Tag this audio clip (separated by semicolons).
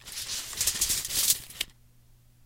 MTC500-M002-s14; steel; tape